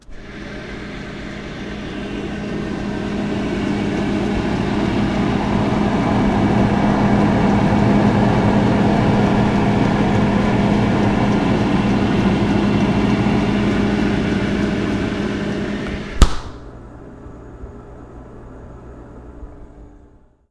freezer inside
Stuck the mic in the freezer for a second.